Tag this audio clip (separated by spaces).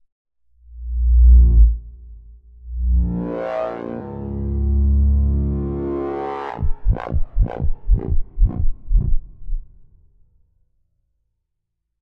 bass sounddesign